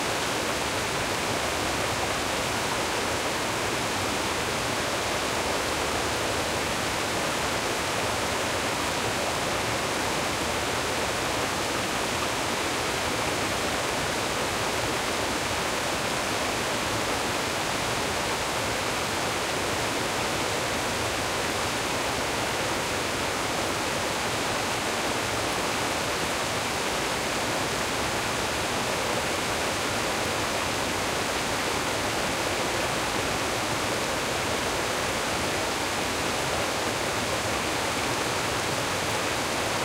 This sound effect was recorded with high quality sound equipment and comes from a sound library called Water Flow which is pack of 90 high quality audio files with a total length of 188 minutes. In this library you'll find various ambients and sounds on the streams, brooks and rivers.